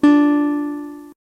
Yamaha F160e Acoustic Electric run through a PO XT Live. Random chord strum. Clean channel/ Bypass Effects.
strum, chord, guitar